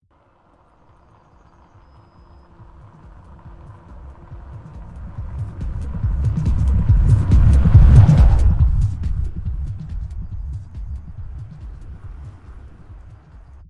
This is my first sound made for Jarreausauce's request: Sound of bass rattling from a car trunk. I hope it'll fill your needs.